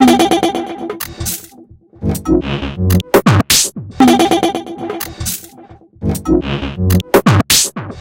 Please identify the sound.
Another weird experimental loop with a slight melodic touch created with Massive within Reaktor from Native Instruments. Mastered with several plugins within Wavelab.